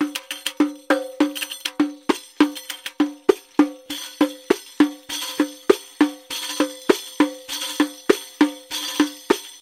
Rpeople Percussion3
Hand Percussion 3
drums, hand-percussion, rhythms